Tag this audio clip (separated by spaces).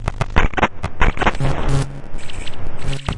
abstract computer contemporary crash digital disconnected effect electro glitch grind noise processed soundtrack synth